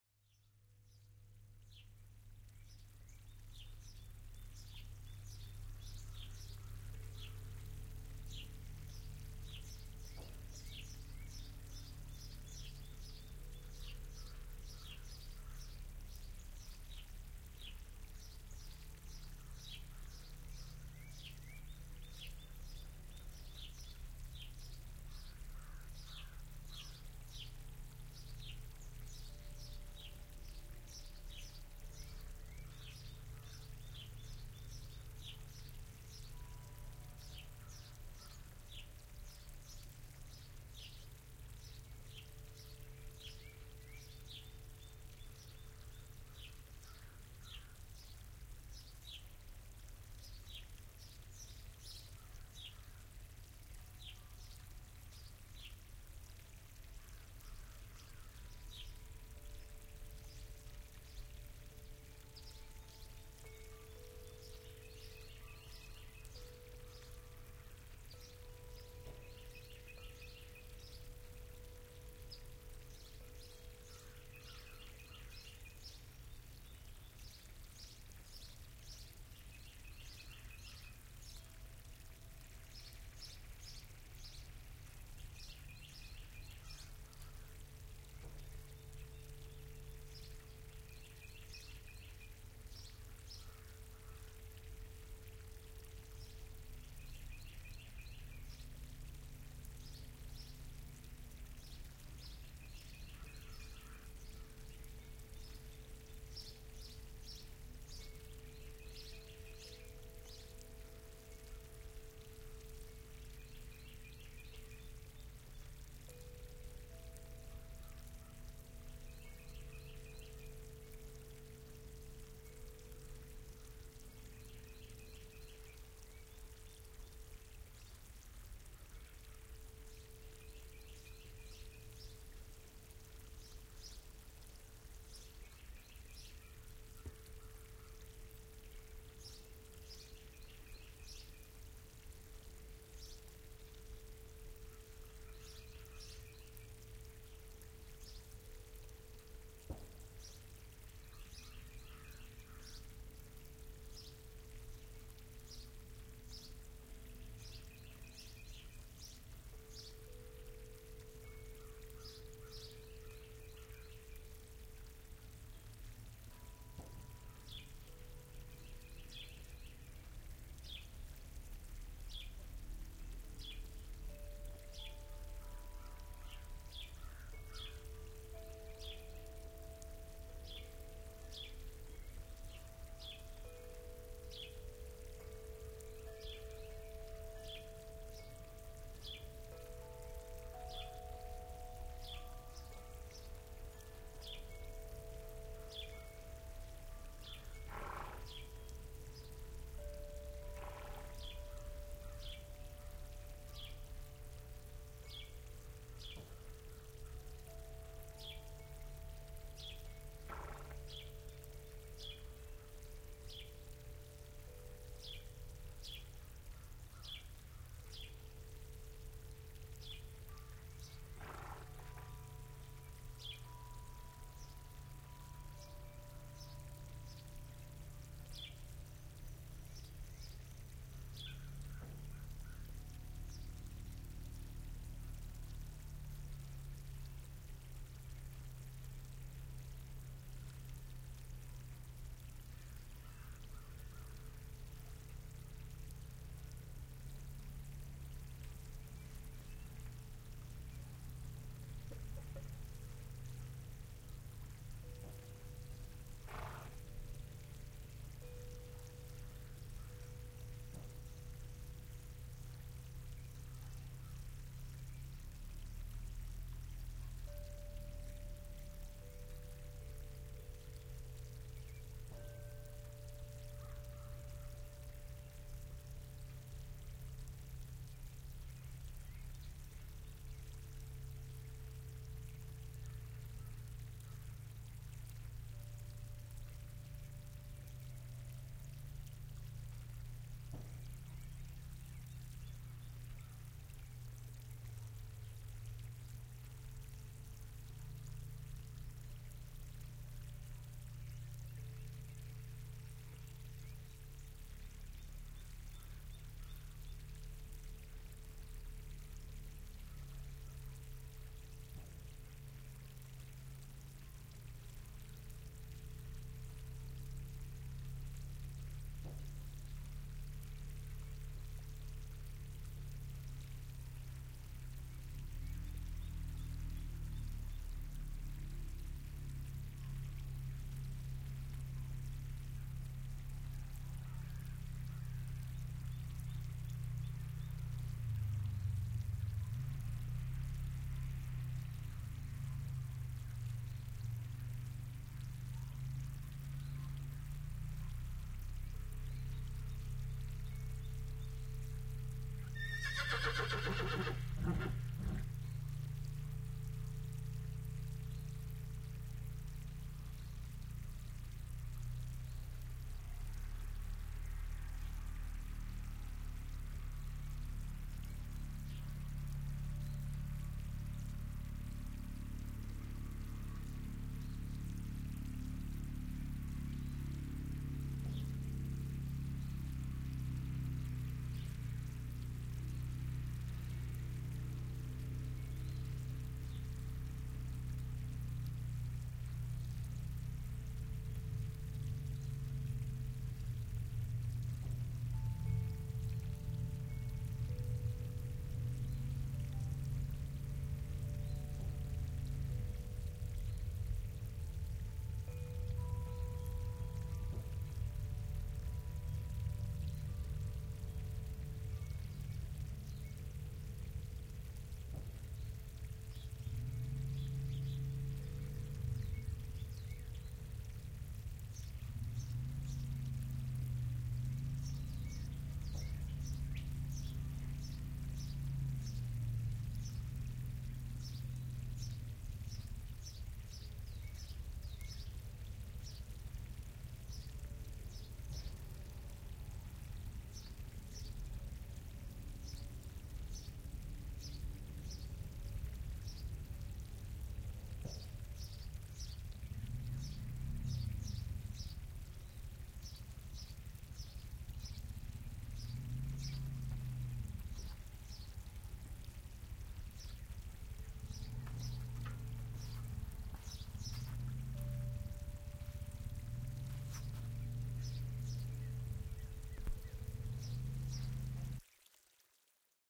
Amb HRTF
A little recording made on my property to show off the TetraMic and Zoom F8. This is a Binaural recording meant for Headphones. Listen with headphones for best results.
Ambisonic, ATV, Binaural, Birds, Chimes, F8, Field-recording, Gun, Headphones, Horse, HRTF, Nature, Oklahoma, Rycote, Shot, Stream, TetraMic, Water, Wind, Zoom